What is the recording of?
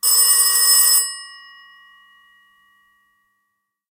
A single ring of a Ericsson RIJEN model 1965.